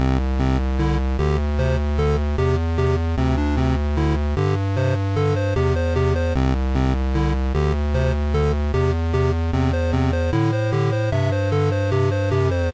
This is a short loop i made with my video tutorial.
Thank you for the effort.